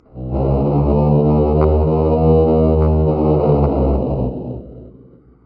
Swiss chocolate sea monster
Started out as a recording of me blowing into an empty triangular chocolate container of Swiss origin. Came out as a scary monster sound.